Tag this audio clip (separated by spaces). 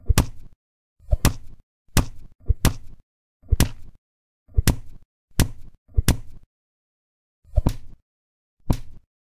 beat fist hit kick punch strike